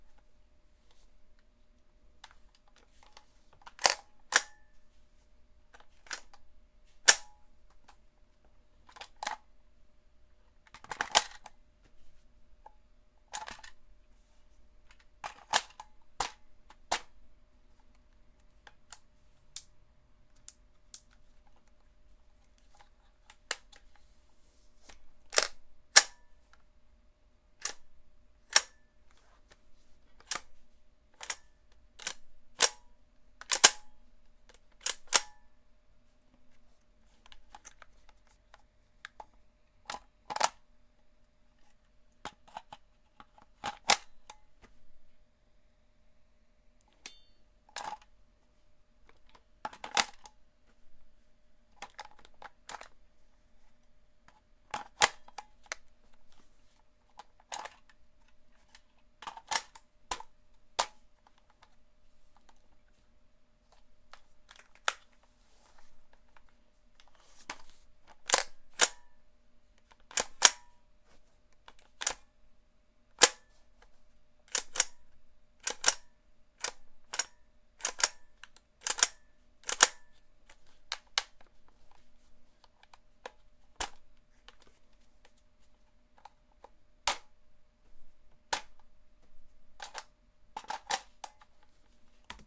Assault Rifle AR-15 Airsoft Handling
Handling and reloading a Lancer Tactical LT-15 airsoft gun. The profile is generic enough to be used in a variety of samples. Recorded using a Tascam DR-05x.
Battle, Gun, Magazine, AR15, Combat, Pistol, Rifle, Reload, Weapon, Firearm